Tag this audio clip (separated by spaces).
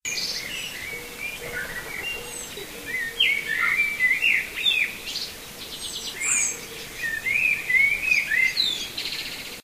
birds south-spain nature andalucia field-recording blackbird